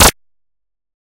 A short electronic soundeffect that makes me think of a short lasergun blast. This sound was created using the Waldorf Attack VSTi within Cubase SX.